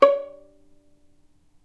violin pizz non vib C#4
violin pizzicato "non vibrato"
non-vibrato, pizzicato, violin